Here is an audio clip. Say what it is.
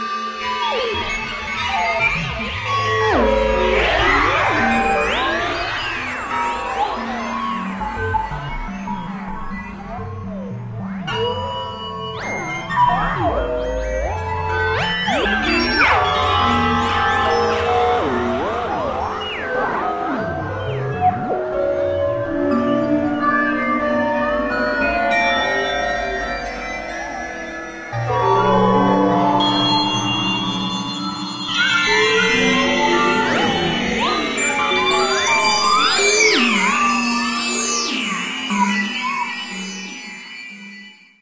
41 second mixup
One of a group of three short pieces using arbitrarily generated pitches of various synthesized resonant tones manipulated in real time (random synth glitch?). Then I take out the parts I don't like.